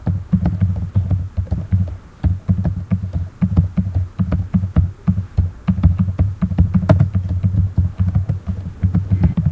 typing on a laptop keyboard2
This is the second version typing on a laptop keyboard, recorded with an asus netbook